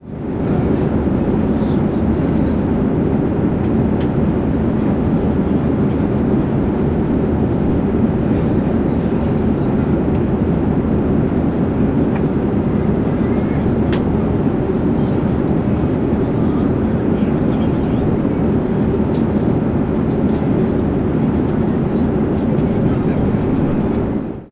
Flying High Up In The Sky, 01
Flying high up in the sky ! Flight attendant walks by with a carriage and serves.
This sound can for example be used in film scenes, games - you name it!
If you enjoyed the sound, please STAR, COMMENT, SPREAD THE WORD!🗣 It really helps!
atmosphere, flight-attendant, up